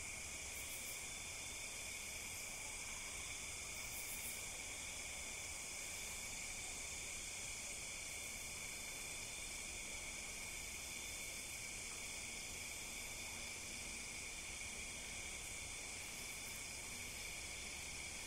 Night Forest 3
This is recorded using Zoom H6 XY configured microphones with 120-degree directionality on both mics with no stand holding it, so there might have some noise from holding the microphone.
It was recorded in the middle of the night in a windy village area in the mountain called Janda Baik in Pahang, Malaysia.
This is the third capture of five.
field-recording, atmosphere, soundscape, evening, forest, night-time, wind, Malaysia, ambient, night, ambience